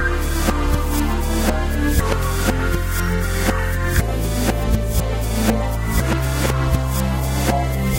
120bpm loop reversed
Extract from my old and unused record, then reversed and bars rearranged to match original position. Bass, drums, synth.
120 bpm, 4 bars, loops almost perfectly.
120bpm, loop